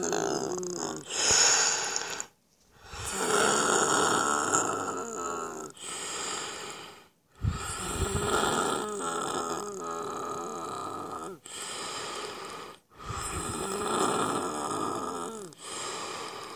The sound of breathing with bronchitis